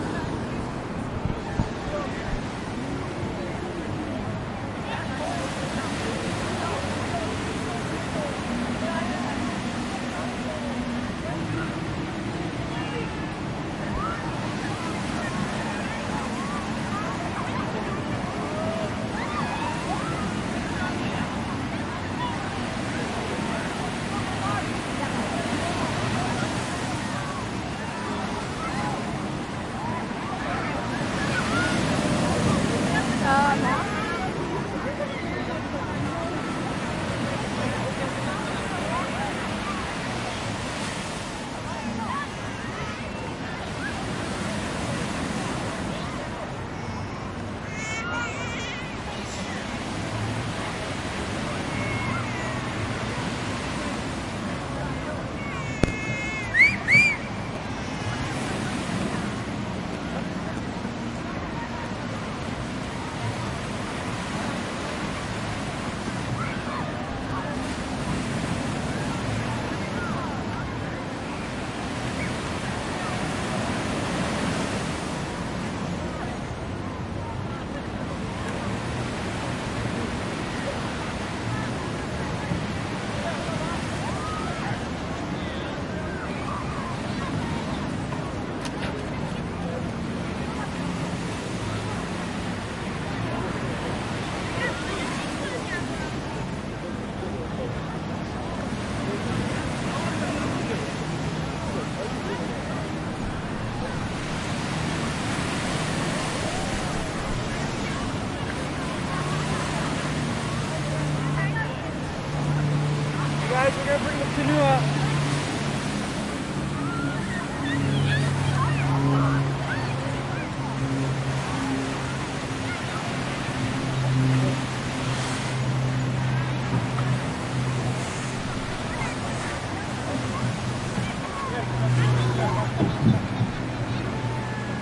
Beach sounds from Waikiki Beach at around 6:00 pm in the evening. This beach doesn't have a lot of big waves and is mostly families on the beach. near the end of the recording, a crew of canoe riders make space to bring their canoe onto the beach.